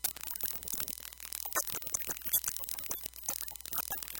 vtech circuit bend029
Produce by overdriving, short circuiting, bending and just messing up a v-tech speak and spell typed unit. Very fun easy to mangle with some really interesting results.
broken-toy, circuit-bending, digital, micro, music, noise, speak-and-spell